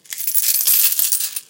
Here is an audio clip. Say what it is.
dropping a mix of European, Canadian, old Portuguese and Spanish coins